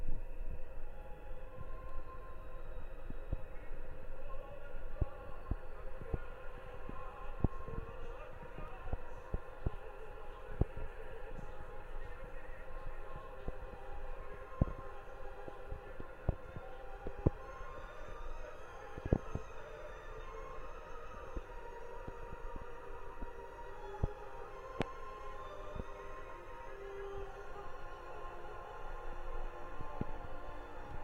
Listening through the pipes
H4n